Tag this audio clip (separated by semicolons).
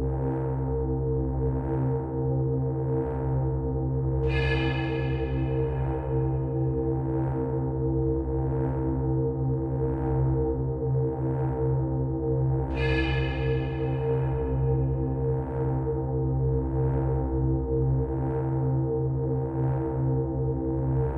pad; soundscape; tone